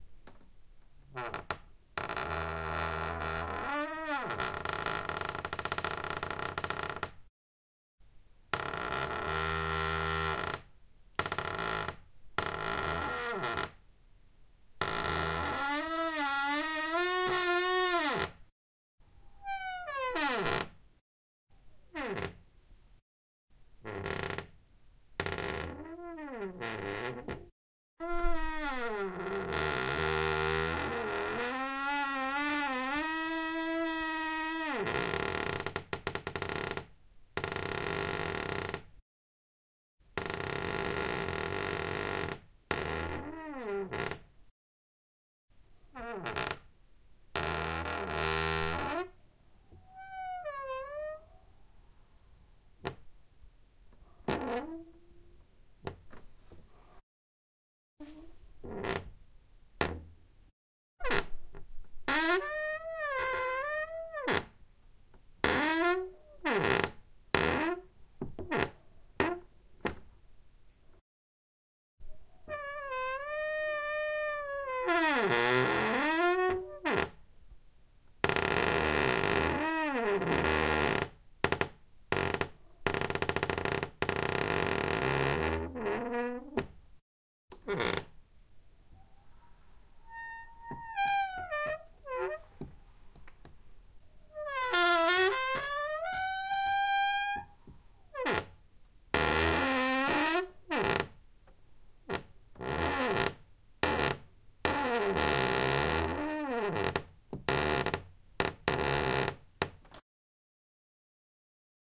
Squeaky creaking door
I closed my door a few times and recorded the noise it was making.
field-recording, old, open, wood